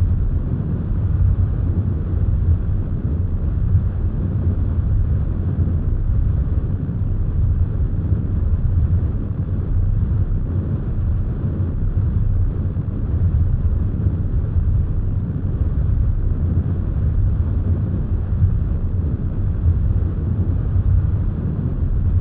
thrusters loop
A loop the sound of a ship's thrusters from inside the ship. Has some nice low rumbling and stereo sound. Created in FL studio with two white noise components, both with a low pass filter and one with an overdrive plugin. Edited in audacity.